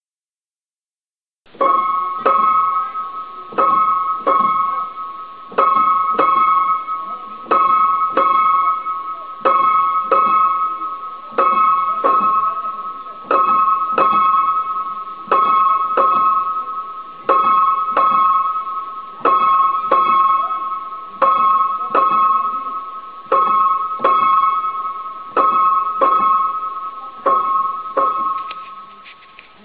piano vell montseny7 P8170246 29-10-2010
the more high notes in the same old piano.
old, montseny7, piano